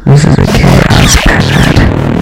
Kaoss Intro
Last night I finished these but actually i did them months and months ago... Pills.... ahh those damn little tablet that we think make everything O.K. But really painkillers only temporarily seperate that part of our body that feels from our nervous system... Is that really what you want to think ? Ahh. . Puppy love..... Last night was so...
weird, vocals, noisy, kaoss, processed, musik